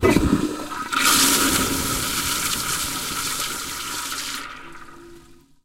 Wingate519TankOff
Another wingate toilet with no water to the tank, recorded in June 2010 with a Zoom h4 and Audio Technica AT-822 microphone.
flush, glug, gurgle, toilet, water, wet